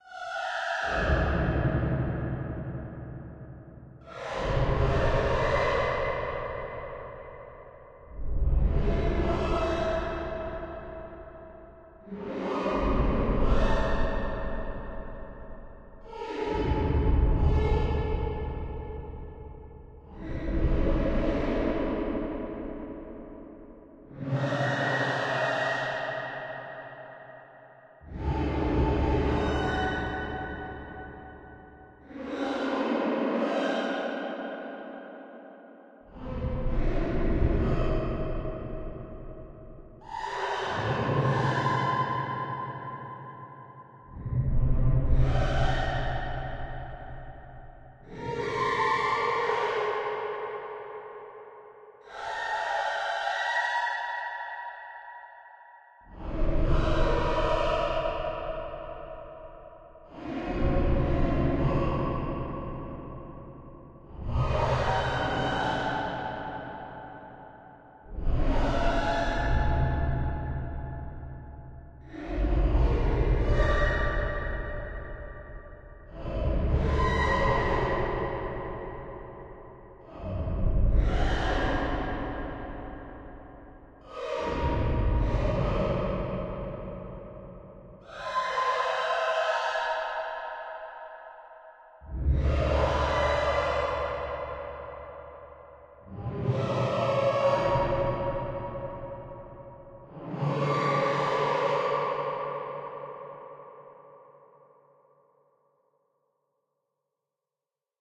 Insane Laughing & Singing Ghost
I added Stereo Reverb, an effect plugin created by Fons Adriaensen. The resulting sound reminds me of shrill, almost-inhuman gibbering, mixed with insane opera-like singing, of the sort that you may hear echoing through the halls of a haunted Bedlam on full-moon nights. I imagine this is what the ghost of an unfortunate who perished in Bedlam might sound like.
ghostly, haunted, gothic, fear, paranormal, spectre, phantom, spooky, Halloween, ghost, creepy